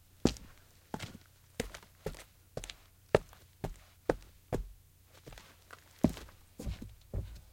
concrete footsteps 1
walking on concrete
walk, concrete-footsteps, footstep, step, steps, footsteps, concrete, walking